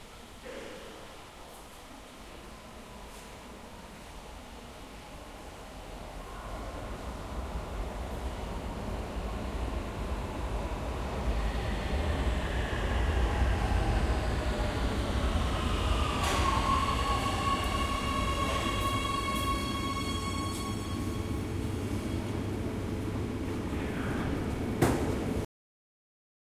Waiting for a train on a metro station